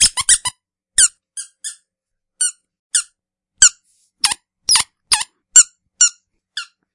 Made by squeezing a dog squeaker toy.
Equipment used: Audio-Technica ATR2100-USB
Software used: Audacity 2.0.5
effect, pitch, high, squeak, toy, squeaker, dog, sound